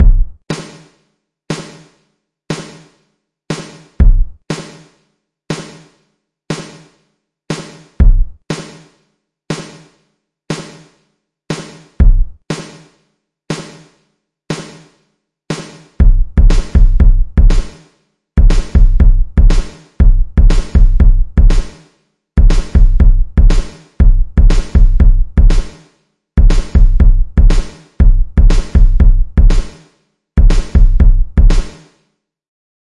Spacious drum pattern
Reverb heavy industrial sounding drum loop
drum, Drum-Kit, Drums, Room, Room-Drum-Kit, Room-Drum-Set